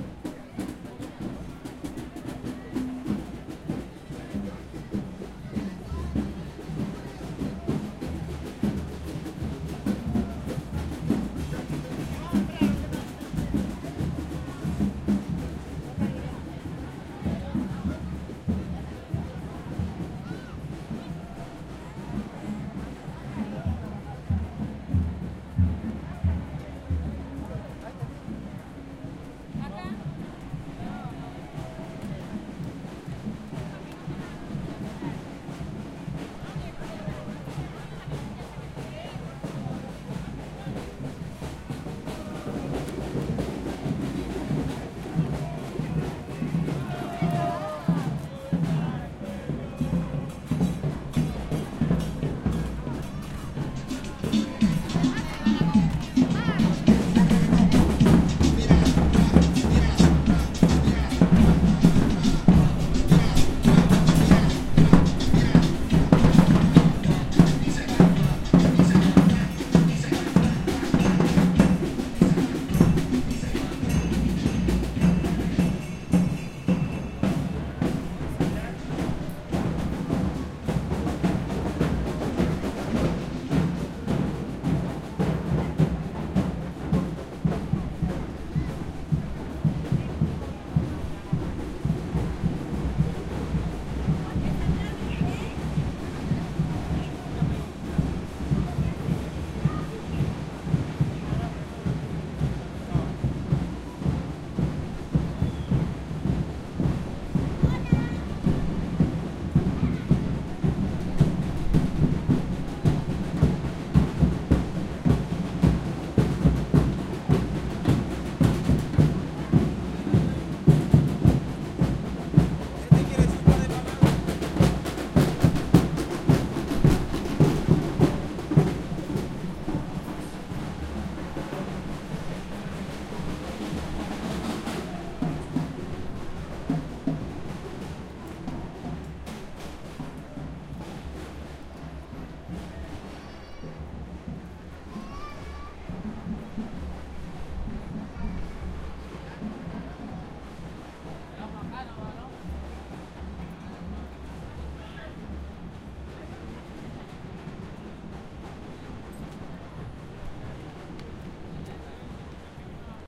Walking Av. Corrientes sound ambience
murga, protest, Street, Buenos-Aires, marcha, drums